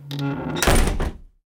Door Close Sqeuak 02
Door closing with a creaking squeak
close, creak, door, lock, lonng, screen, squeak, unlock, wood